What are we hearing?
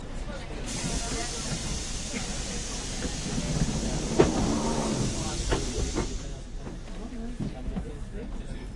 London underground 10 door with hissing sound
The door in a London Underground train closes, producing a hissing sound.